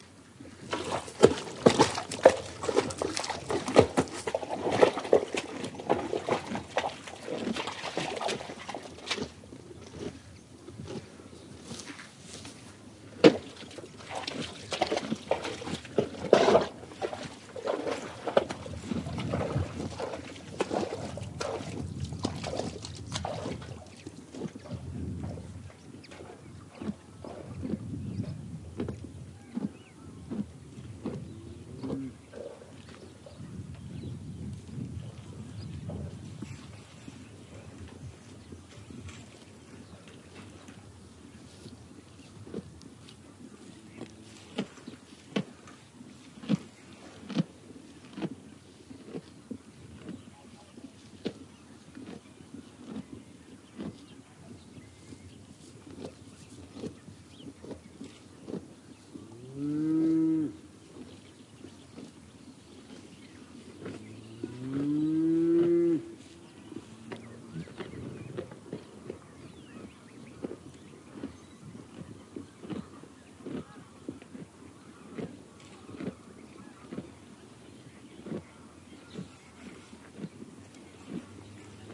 noises from cows in marsh: squelching, chewing, distant moos. Sennheiser MKH60 + MKH30 into Shure FP24, Olympus LS10 recorder. Decoded to mid side stereo with free Voxengo plugin. Recorded near Lucio del Lobo, Donana, Spain